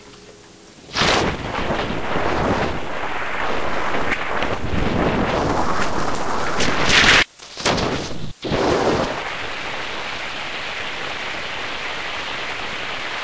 sample exwe 0243 cv fm lstm 256 3L 03 lm lstm epoch25.14 1.6610 tr
generated by char-rnn (original karpathy), random samples during all training phases for datasets drinksonus, exwe, arglaaa
char-rnn, generative, network, neural, recurrent